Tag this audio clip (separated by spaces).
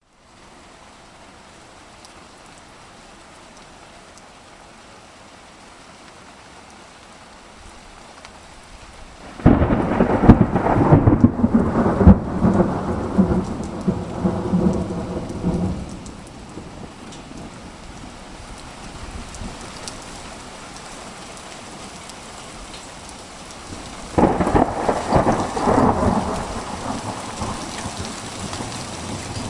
hail Thunderstorm lightning